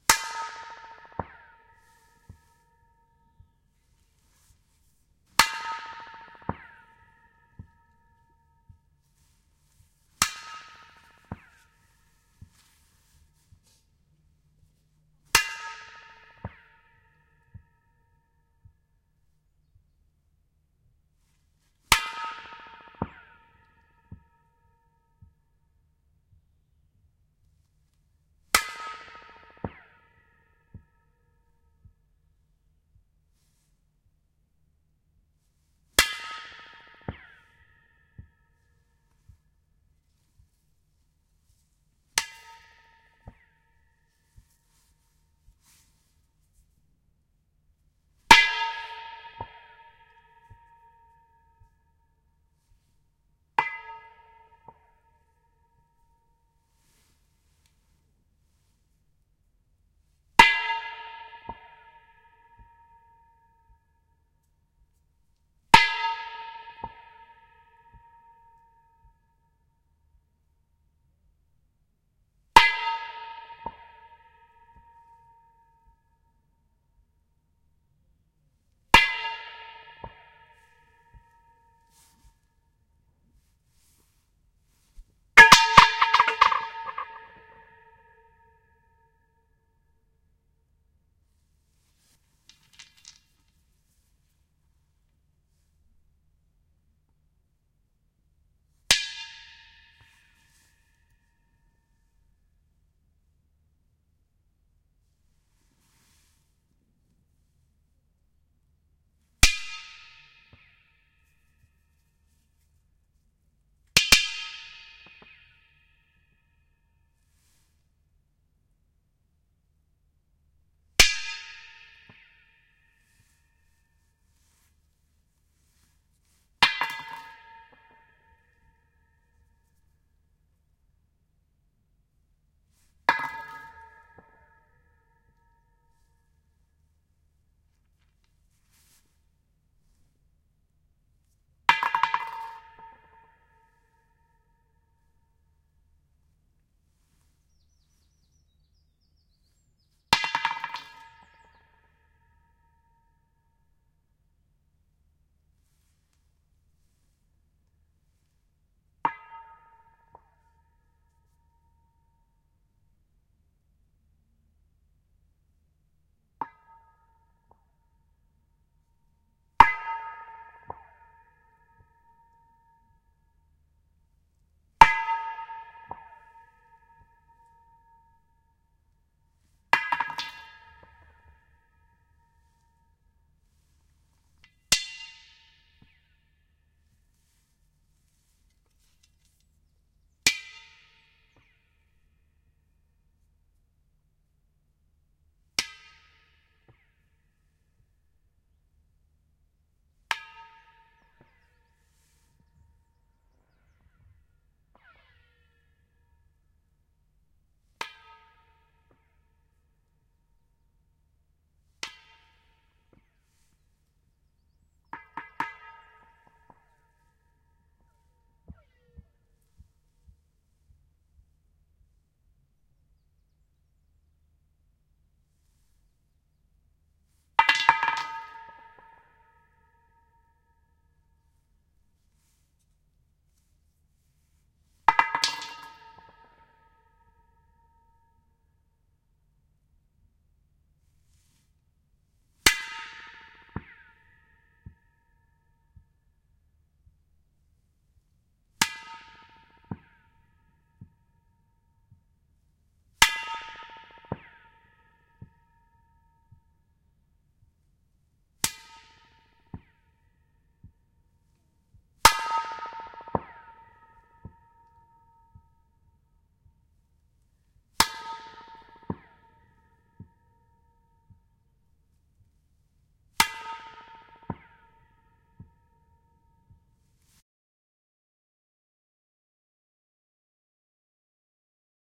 Pipe Echoes
Various claps, hits, sticks and stones recorded into the end of a 150m length of heavy plastic pipe that was ready to be laid down on my local beach. Recorded using a Zoom H2 - compiled an edited to remove additional noise. Could be useful for sound-effects or convolution reverb.
plastic, pipe, phase